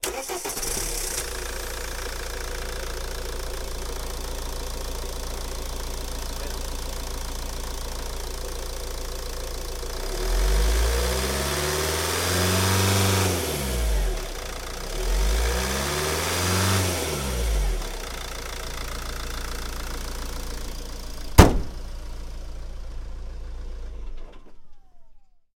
sons cotxe motor fora 2011-10-19
car, field-recording, sound